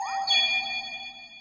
Fantasy ui Button 6
chime, fairy, bell, ui, sparkle, tinkle, jingle, crystal, chimes, spell, airy, sparkly, ethereal, Button, Fantasy